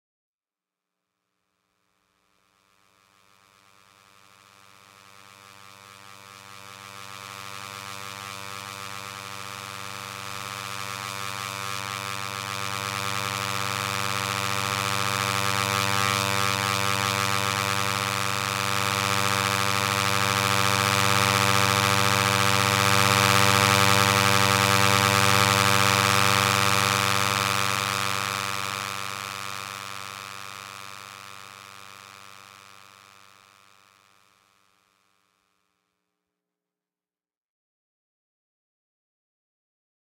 Macbook Electromagnetic Sounds
Macbook keyboard electromagnetic sounds
LOM Elektrosluch 3+ EM mic
pick-up, electrical